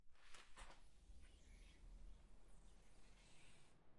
opening window climalit
glazing type climalit, binaural recording
glide, switched, window, windows